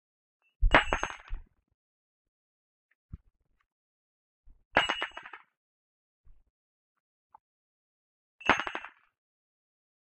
While working with explosions, I had to recreate the elements for throwing a grenade. Well, here it the pin pull. I tossed a large metal bolt on the ground and a large metal chisel with it to create this grenade hitting ground sound effect.